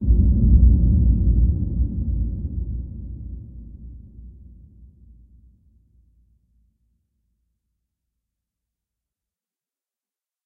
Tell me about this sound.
DISTANT EXPLOSION 01
The simulated sound of a faraway explosion. Example 1 of 2